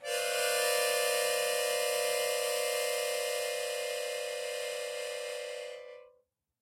A chromatic harmonica recorded in mono with my AKG C214 on my stairs.